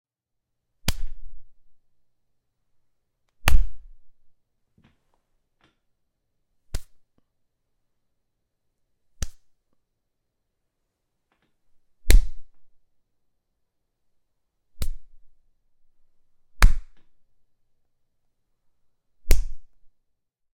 Series of hand slaps of differing timbres and dynamics, with short pauses between.